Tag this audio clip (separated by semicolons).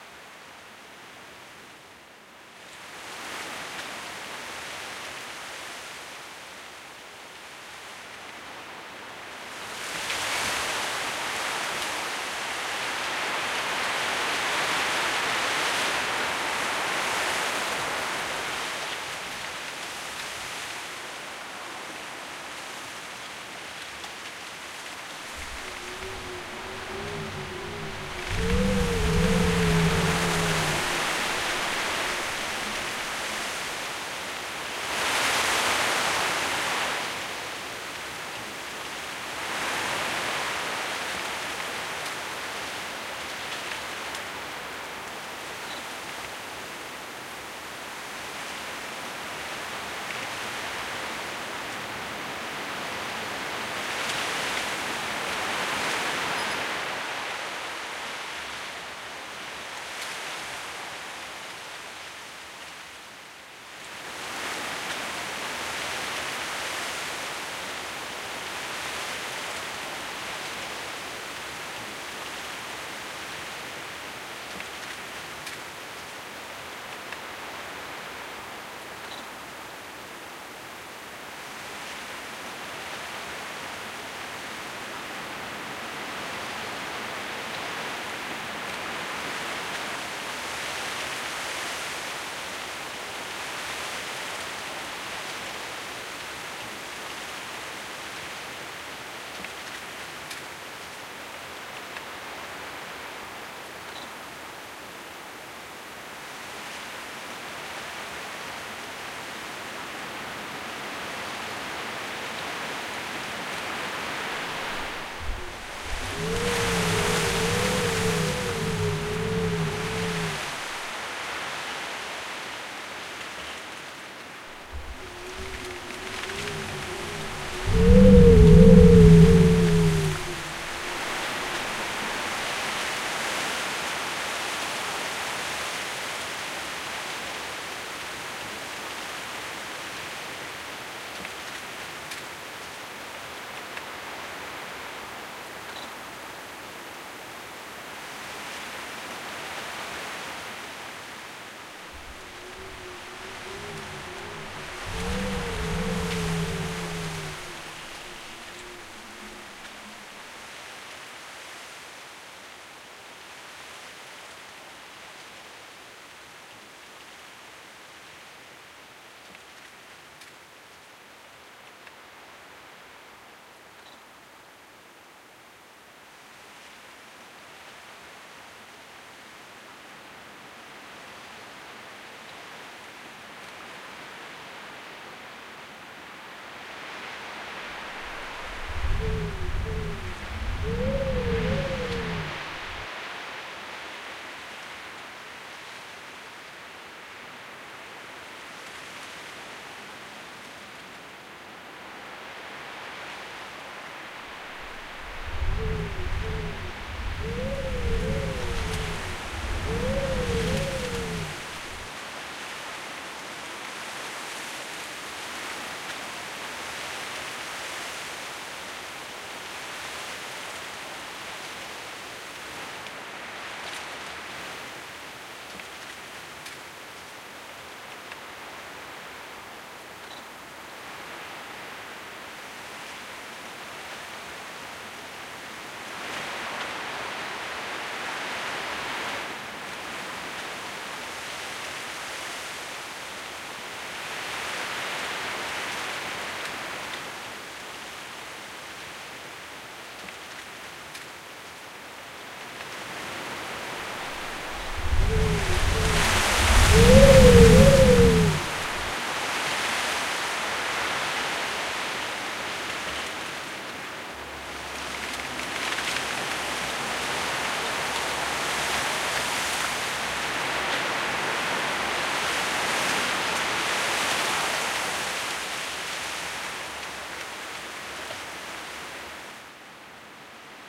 howl; loop; request; stereo; wind